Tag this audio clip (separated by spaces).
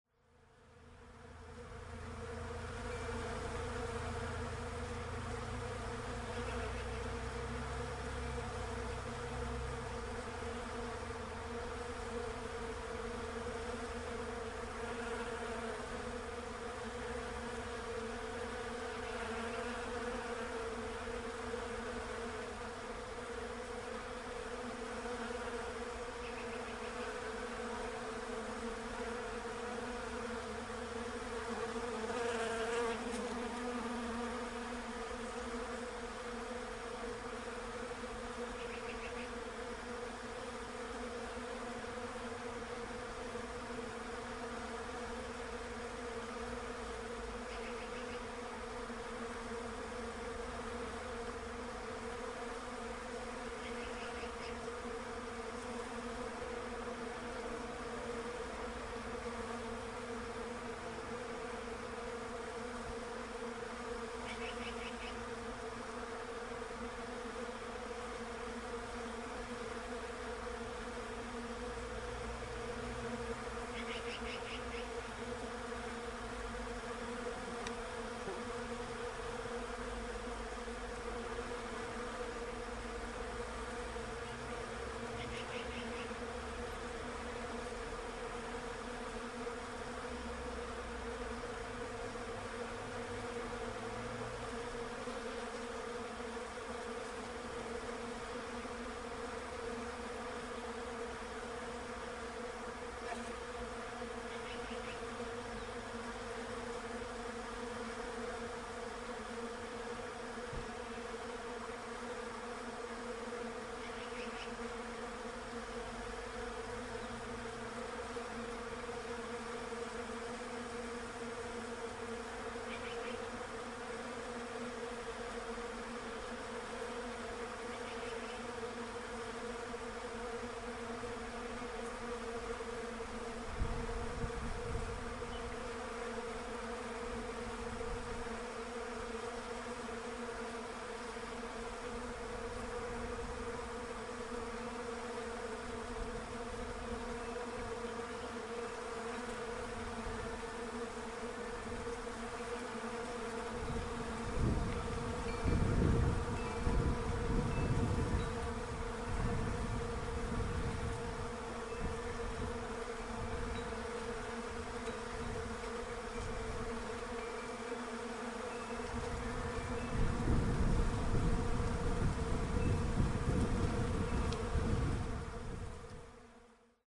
bee birds buzz flowering-tree insect magpie spring wind-chime